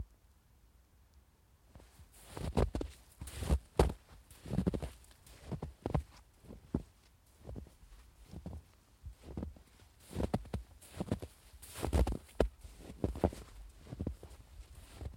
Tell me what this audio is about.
footsteps in snow 5
snow, winter, footsteps, steps